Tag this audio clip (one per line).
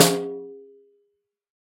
1-shot
snare